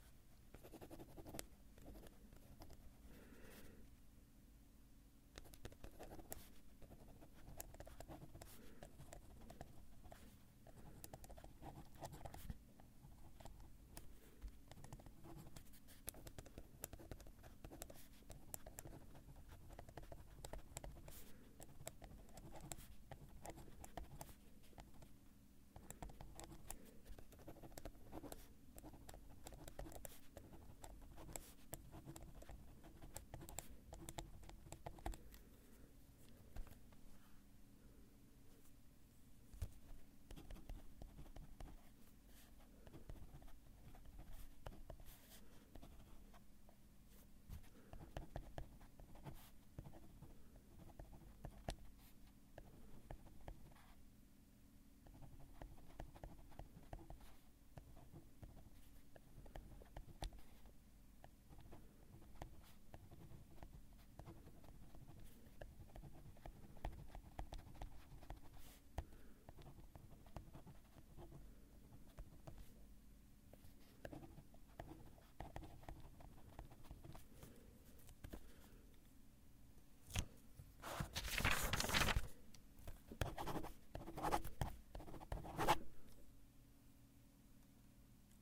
Pen on paper. Recorded with a Neumann KMi 84 and a Fostex FR2.
Kulli, Office, paper, Papier, pen, schreiben, signature, stroke, write, writing